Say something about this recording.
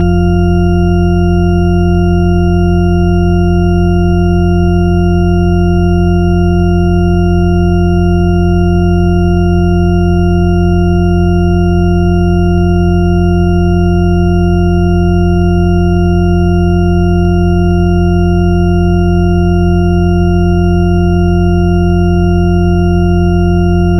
From Wikipedia:
"A Shepard tone, named after Roger Shepard (born 1929), is a sound consisting of a superposition of sine waves separated by octaves. When played with the base pitch of the tone moving upward or downward, it is referred to as the Shepard scale. This creates the auditory illusion of a tone that continually ascends or descends in pitch, yet which ultimately seems to get no higher or lower."
These samples use individual "Shepard notes", allowing you to play scales and melodies that sound like they're always increasing or decreasing in pitch as long as you want. But the effect will only work if used with all the samples in the "Shepard Note Samples" pack.